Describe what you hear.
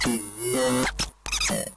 A circuit bent electric tweak sound ripped from a recording session of a circuit bent laser gun for kids.
2/3 circuit bent loopable sounds from my circuit bent sample pack II.